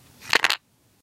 A series of sounds made by dropping small pieces of wood.